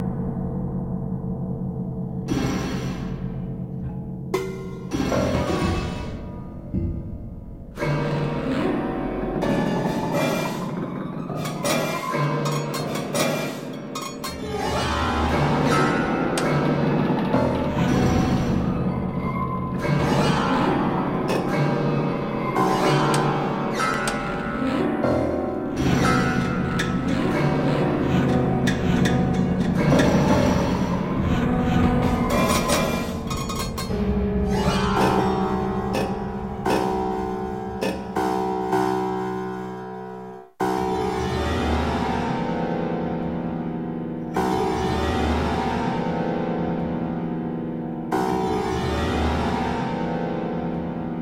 Piano Dust 1
idm, soundscape, ambient, glitch, piano